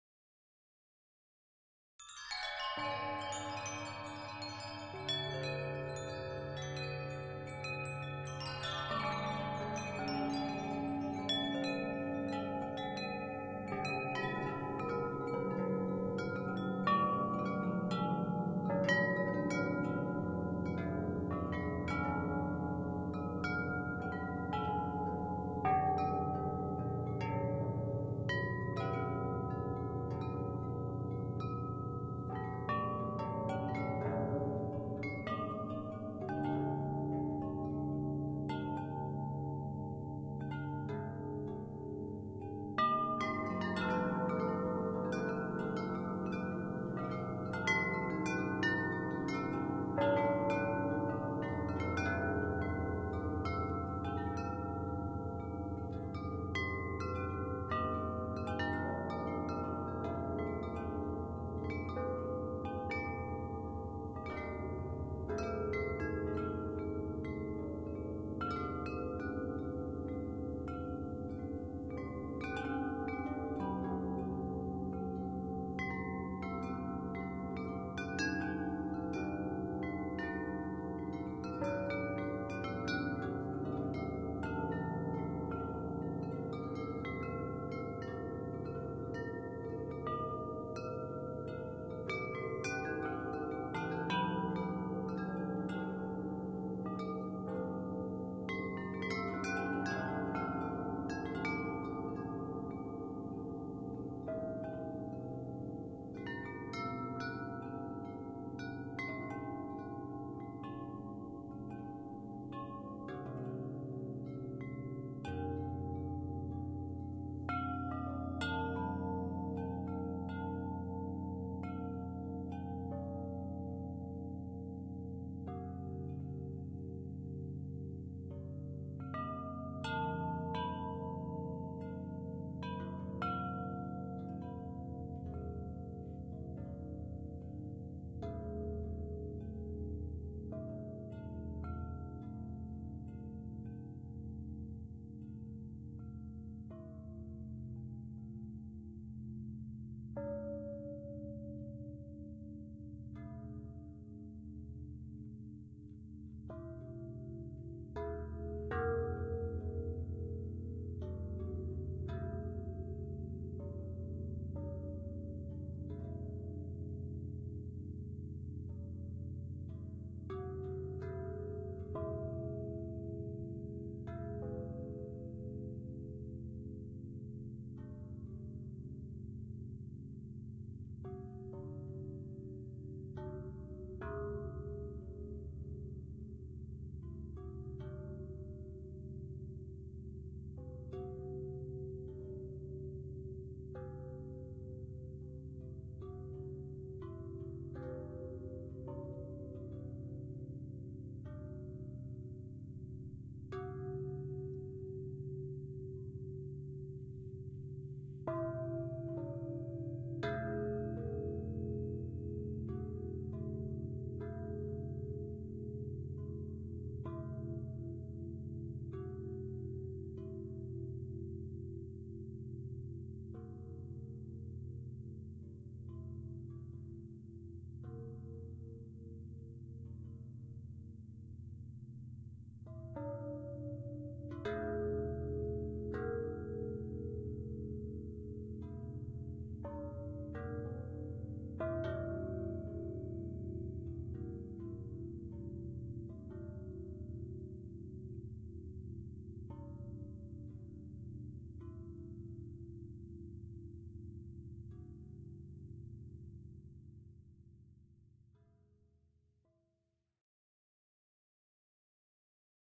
metal windchimes, beginning with a dense texture and gradually becoming more sparse, processed in Kontakt and edited in BIAS Peak